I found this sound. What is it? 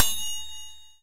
fpphone-bellding
The bell on a pull-along toy phone, which chimes when the dial is rotated.